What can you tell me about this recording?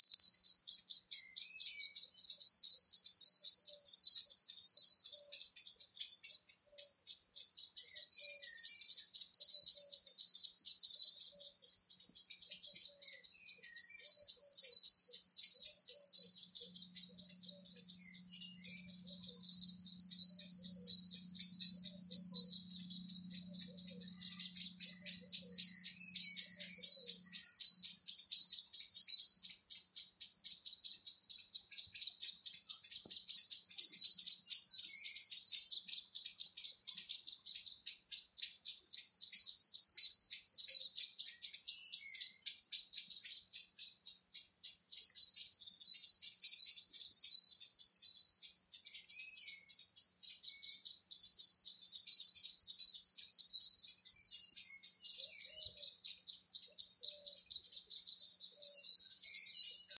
Sunrise recording made by Genevieve Rudd in Gorleston, May 2020.